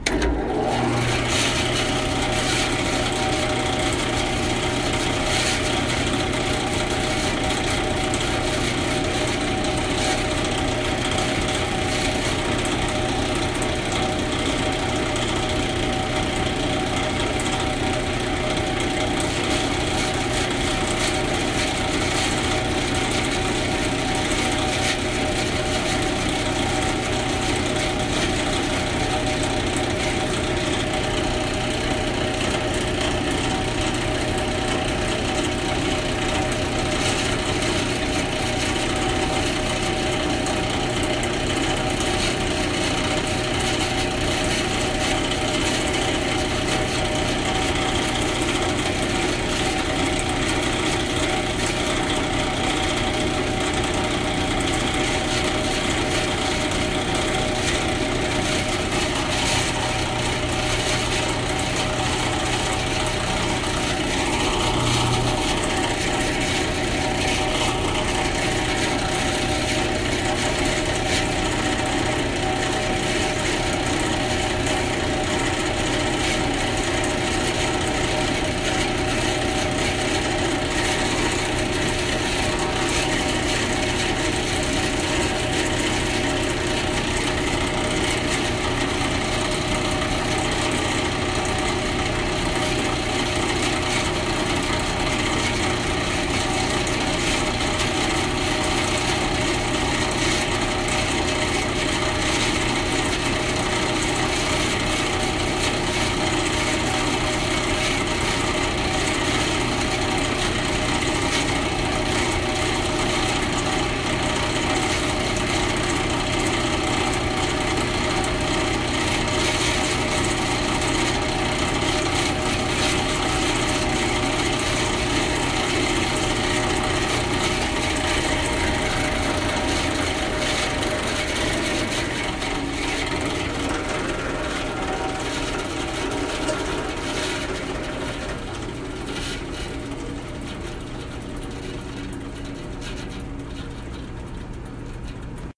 Sound of a bandsaw running, including start and stop
bandsaw, machine, mechanical, motor, power-tools, whir, work-shop